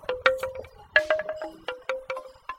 Short recording of wind chimes at a garden centre
clonk, wind-chime, wood